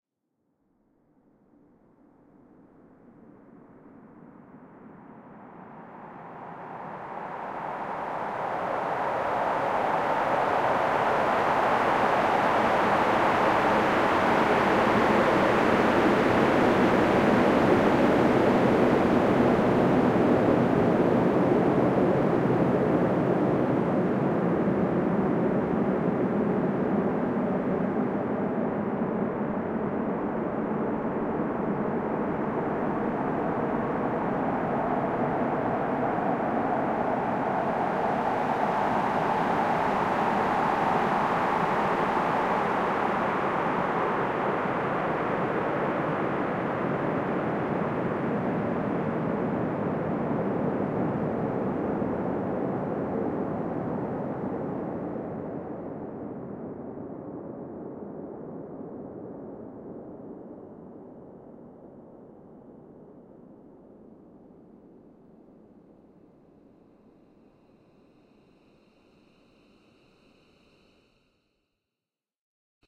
A clipped F18 recording that I thought may be salvageable as an ambiance. Someone may find it useful.
wooshing, noise, synthetic-atmospheres, rushing, jet, air